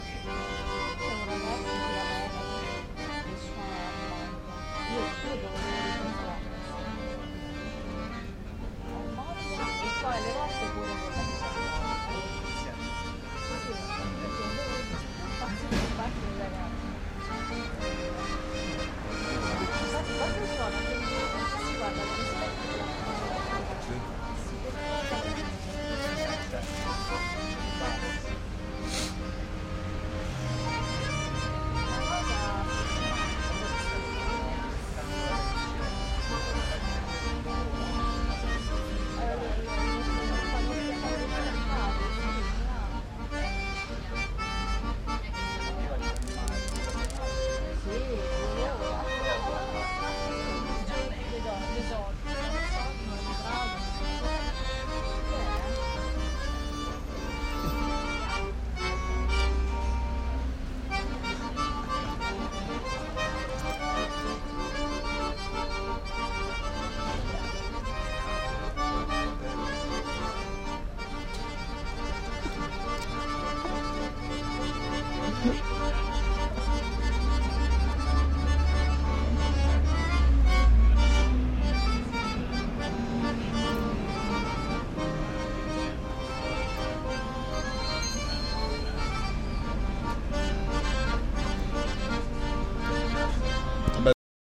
AMBY FISARMONICA PARIGI MONO
sound recorded in Rome on Ferrari street with an iphone